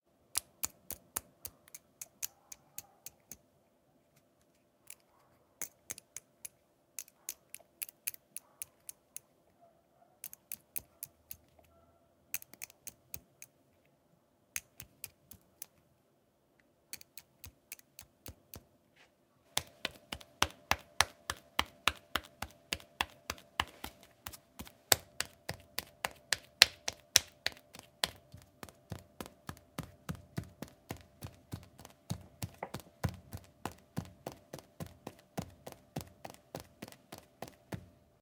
Claws animal (foley)
It's a foley that you can layer with animals or creatures footsteps. Here could be a cat or a dog
There's a tiny background noise that you can remove with Izotope's plugins
animal, animals, cat, cats, claw, claws, dog, domestic, meow, pet, pets